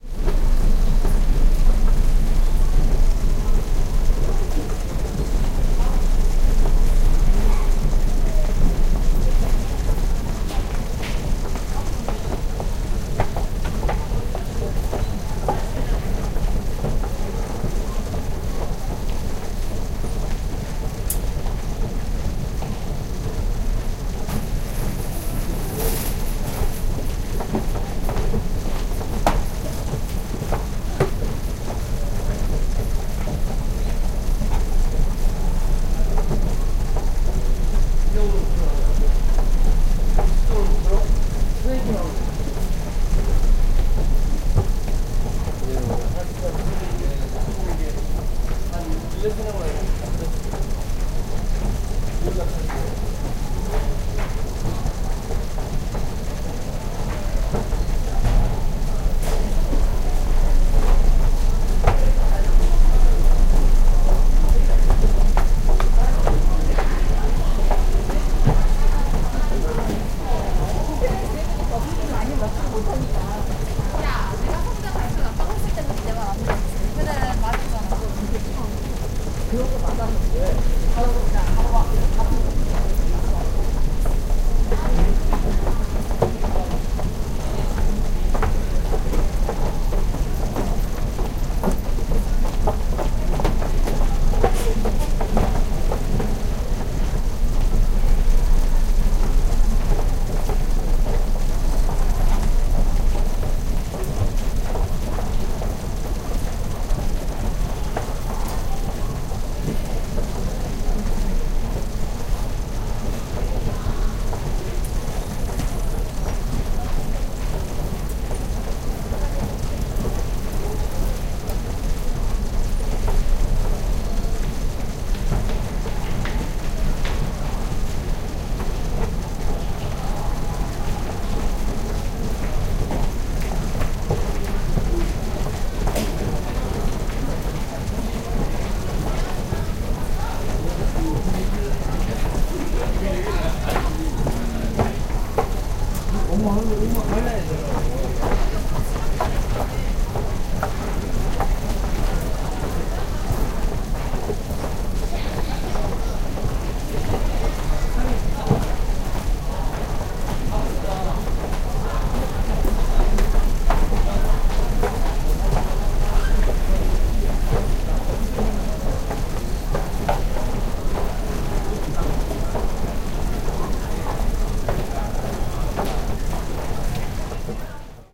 Beginning mechanical stairs people walk and talk. Metro station
20120112